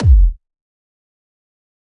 Punch Throat Kick
This is a hard one hit kick. 2 kick sounds have been manipulated with EQ and effects, but not over processed, put together into one. So what you end up with is a clean kick that you can hear and feel. Rendered in FL Studio.
Harsh, Industrial, Metal